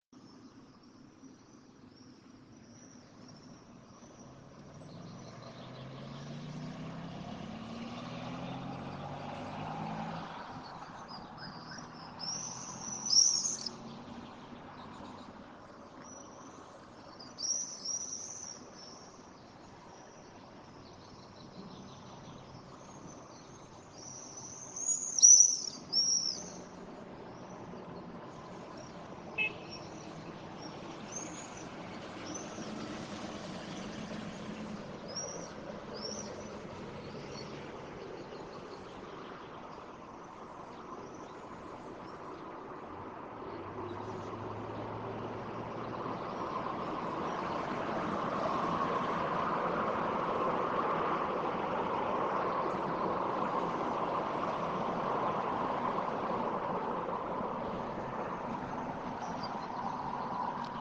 im open window Record morning in the russia
bird, wild, morning, nature, field-recording, city, birds, forest, russia